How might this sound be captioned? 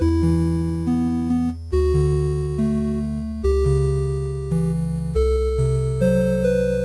8-bit chord loop